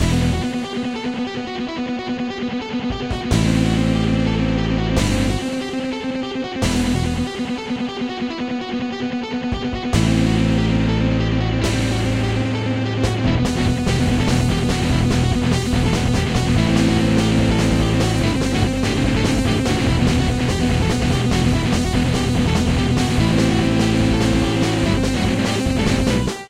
Slay the Dragon
Power metal is the magic ingredient to every dragon fight!
You can do whatever you want with this snippet.
Although I'm always interested in hearing new projects using this sample!